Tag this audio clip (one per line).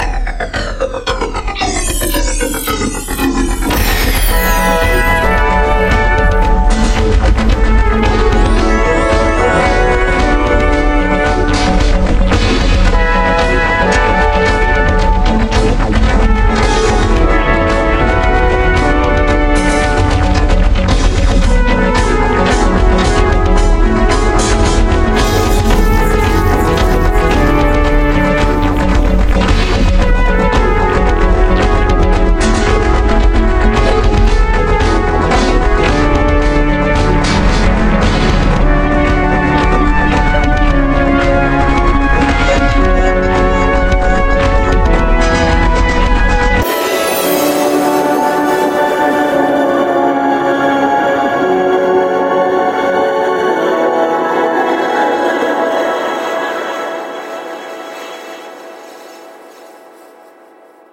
distortion
delay
low-fi
reverb
song
slow
echo
effects
challenge
beat
equalization
drum
drums
melancolic
electronic
bass
new-wave
80s
melody
music
techno
electro
synthwave
dance
glitch
low-pass
badoink
synth
loop